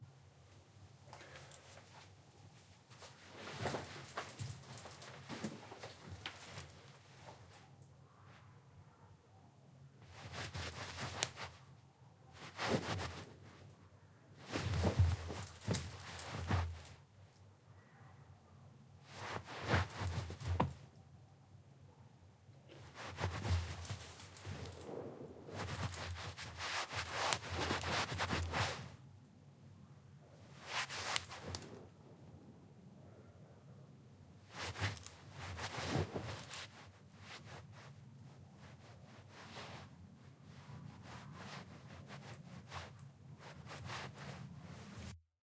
Rustling Fabric 2
textile, movement, rustle, rustling, clothing, moving, shirt, cloth